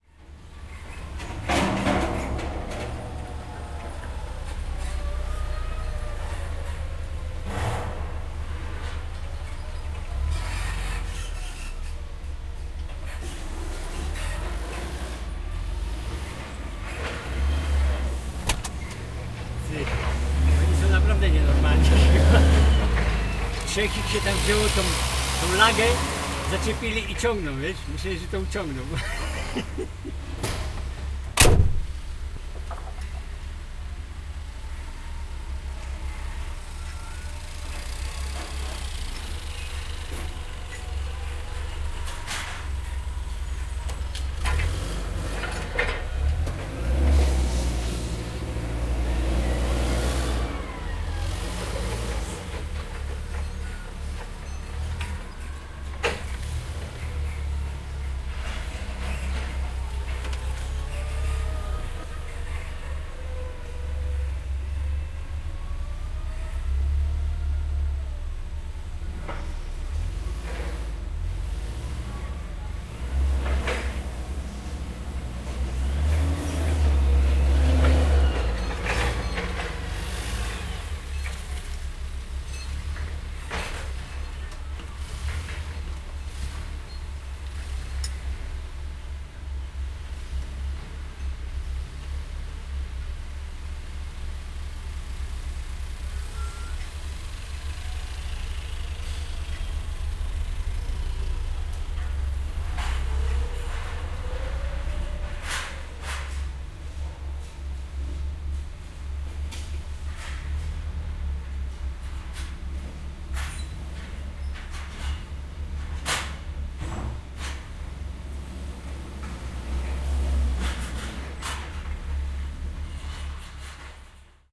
04.08.2011: fifth day of ethnographic research about truck drivers culture. The fruit-processing plant in Neuenkirchen in Germany. sounds of unloading 500kg boxes with blackcurrant (forklift's creaking, rumbling, banging)